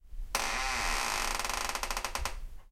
Door Squeak, Normal, E
Raw audio of a dishwasher door squeaking open, sounding like a regular door.
An example of how you might credit is by putting this in the description/credits:
The sound was recorded using a "H1 Zoom recorder" on 19th May 2016.
door, wooden, creak, normal, squeak